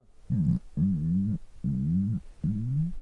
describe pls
Someone was rather hungry.
Recorded with Zoom H4n